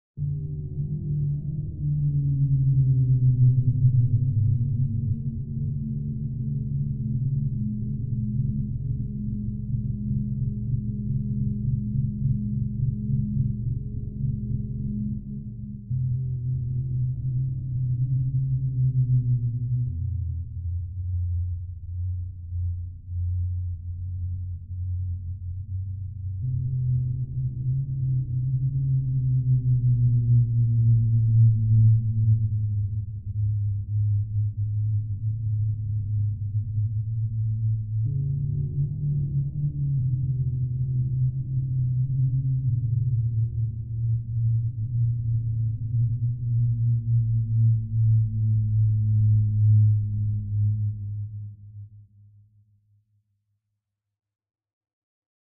horror ambience deep
Sound for a background, noise like, deep and bass accented sample.
sound, horror